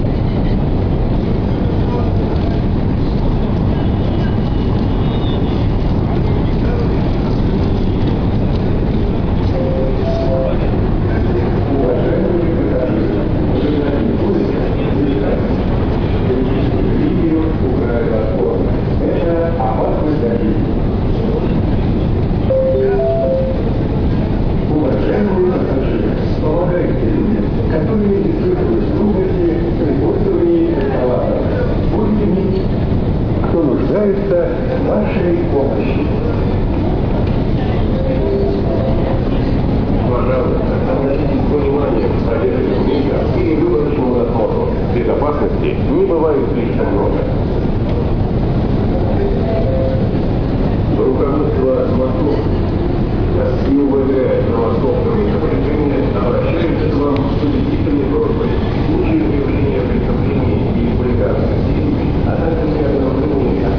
The sounds of the speakers that like to talk on the escalators in Moscow Metro.
Recorded with a trashy old digital camera for that extra charm!
ambient, bustle, crowds, hustle, metro, moscow, speaker, speakers, underground